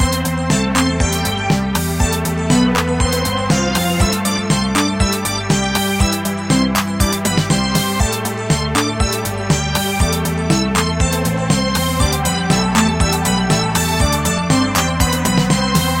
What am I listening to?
Short loops 12 03 2015 2
made in ableton live 9 lite
- vst plugins : Alchemy, Strings, Sonatina Choir 1&2, Organ9p, Microorg - Many are free VST Instruments from vstplanet !
you may also alter/reverse/adjust whatever in any editor
gameloop game music loop games organ sound melody tune synth happy